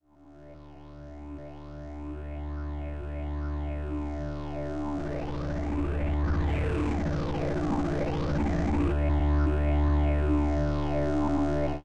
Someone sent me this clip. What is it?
This sample was created in Ableton Live 9 using various synths and layering.